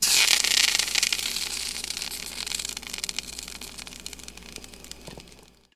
tiny sizzle
a drop of water fell on the surface of a coffee pot hot plate and made this sound as it bounced around.
SonyMD (MZ-N707)
spark, electric, sizzle, hot